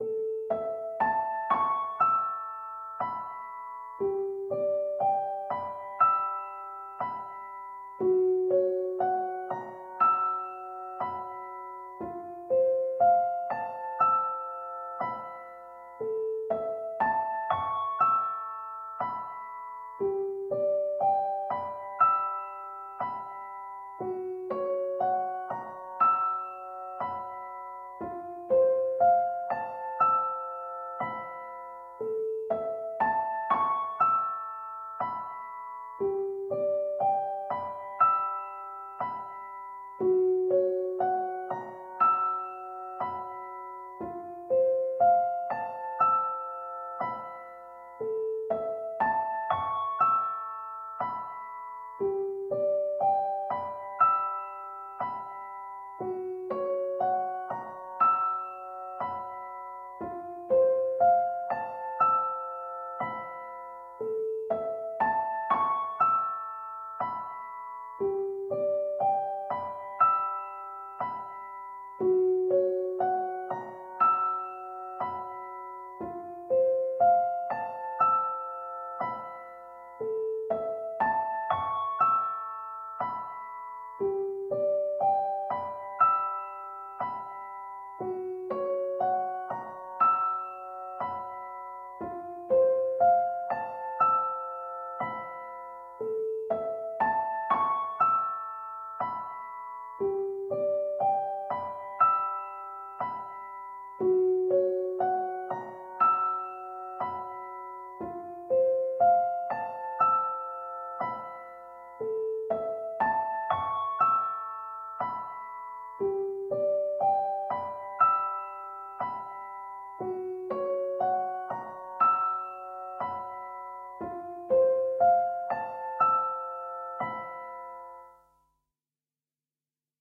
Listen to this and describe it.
Piano loops 005 octave up long loop 120 bpm
samples, simple